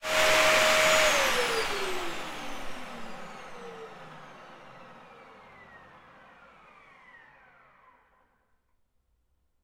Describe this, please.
A Dyson vacuum cleaner switching off